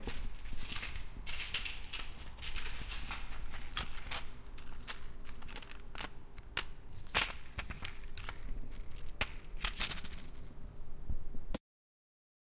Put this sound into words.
Pill blister packet
health, medicine